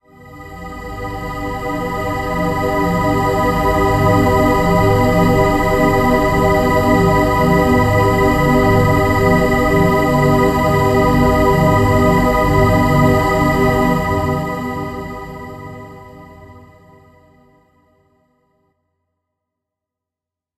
Deep and dark dramatic pad with alot of disonances. Dim / Aug chords in perfect harmony